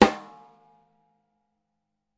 Self made 13" snaredrum recorded with h4n as overhead and a homemade kick mic.
drum, h4n, snare